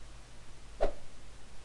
Some fight sounds I made...